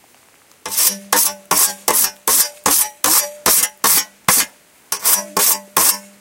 scythe sharpening, the hiss in the background is rain
sharpening, scythe, old-fashion